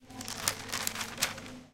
Sound of newspaper pages being read in library.
Recorded at the comunication campus of the UPF, Barcelona, Spain; in library's lobby.